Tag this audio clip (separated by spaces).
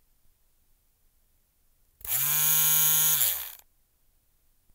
barber beard clippers cut hair haircut salon shaver shaving trim trimmer Wahl